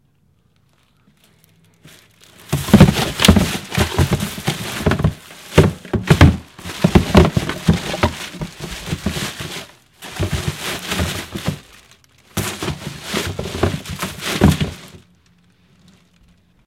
Revolving Trash
I make this soundtrack revolving my own trash can.
revolving, Trashcan